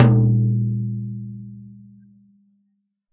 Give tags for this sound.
1-shot
tom
multisample
velocity
drum

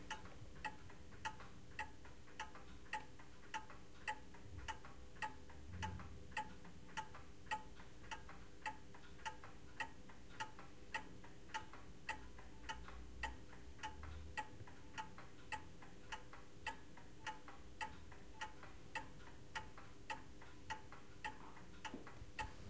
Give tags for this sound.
clock
ticking

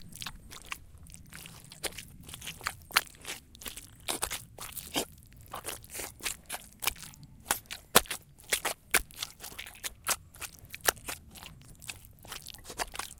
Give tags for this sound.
squelch
wet

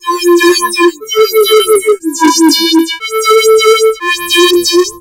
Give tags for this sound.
Phaser
Square
Audacity

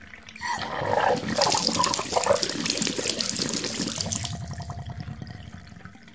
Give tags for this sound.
bath
drain
drip
gu
gurgle
splash
trickle
water
wet

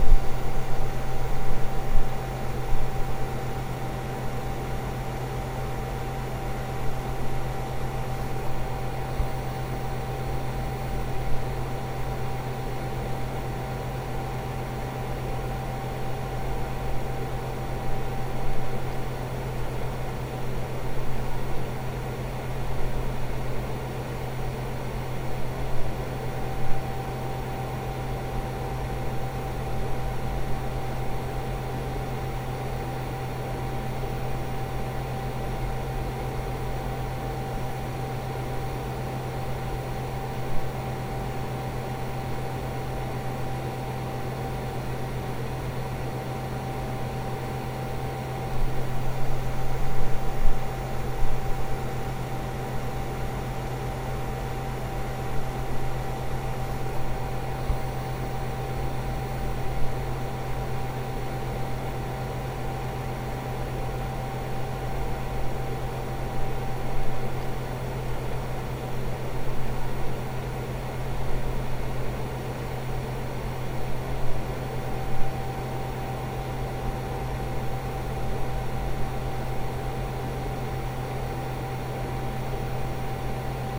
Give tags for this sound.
xbox
sound
industrial
machine
fan
hum
ambience
air
360
electronics
ambient
mechanical
noise
buzz

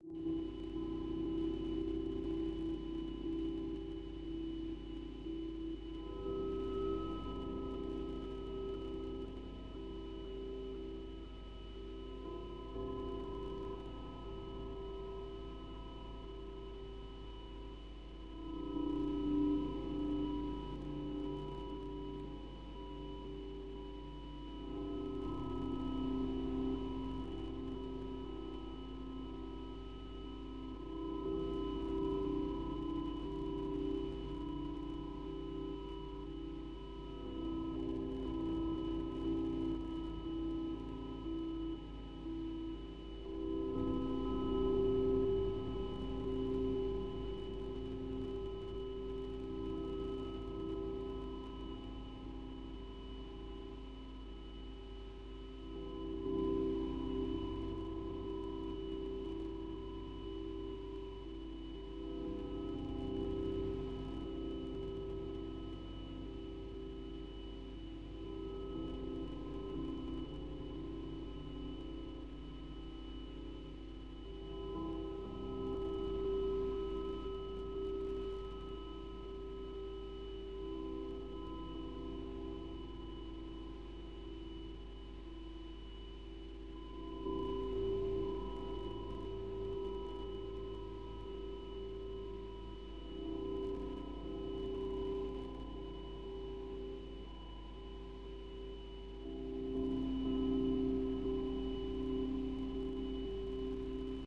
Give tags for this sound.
obsolete
granular
bell
soft
noise

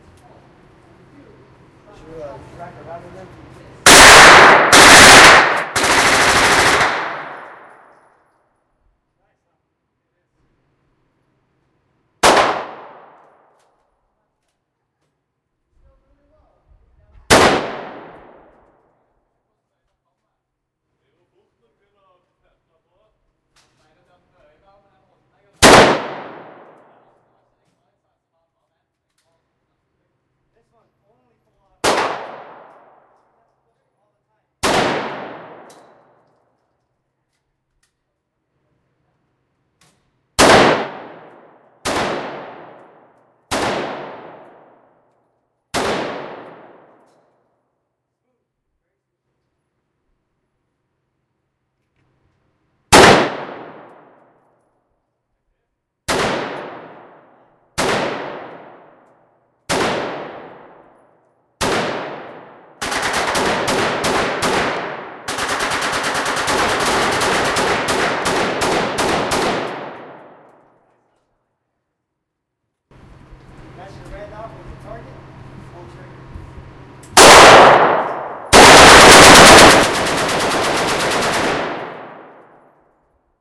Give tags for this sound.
ak47
assault-rifle
firing
full-auto
gun
gun-range
gunshot
machine-gun
machinegun
rifle
shot
weapon

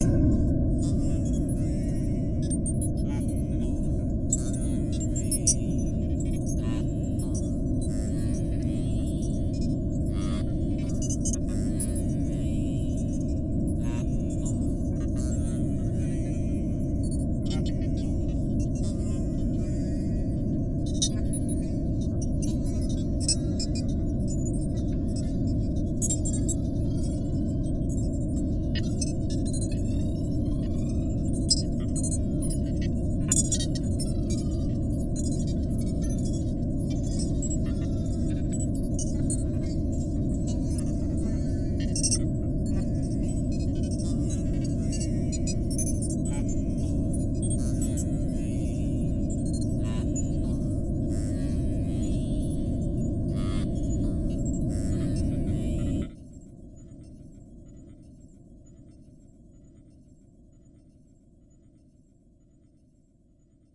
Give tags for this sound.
ambient
sound-design
rumble
drive
ambience
impulsion
dark
sci-fi
space
deep
electronic
noise
futuristic
effect
atmosphere
soundscape
future
spaceship
Room
energy
starship
engine
fx
drone
emergency
hover
background
bridge
pad
machine

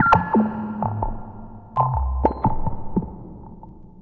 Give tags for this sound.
bleep
computer
digital
fx
glitch
noise
sci-fi
tlc

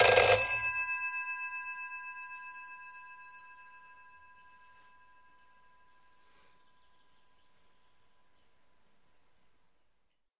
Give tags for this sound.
environmental-sounds-research
phone
ring
single
british

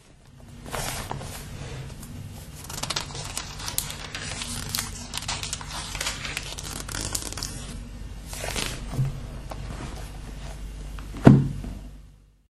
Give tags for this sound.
book
paper
turning-pages

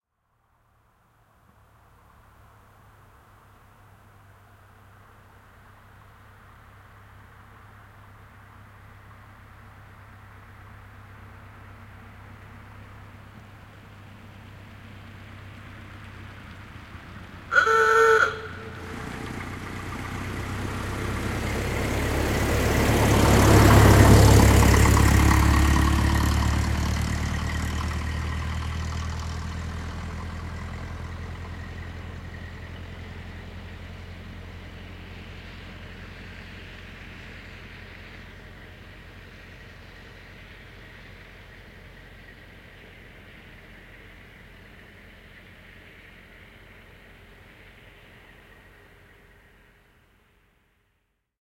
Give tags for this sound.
Motoring
Autoilu
Car-horn
Auto
Finland
Soundfx
Field-Recording
Finnish-Broadcasting-Company
Tehosteet
Yle
Suomi
Cars
Autot
Yleisradio

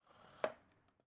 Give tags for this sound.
chair
drag
dragging
floor
furniture
kid

